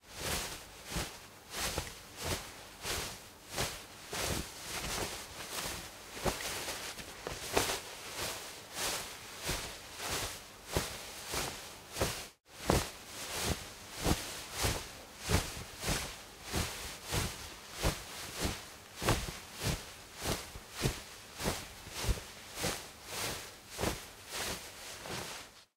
Cloth Rustle 3
Cloth, Foley, Rustle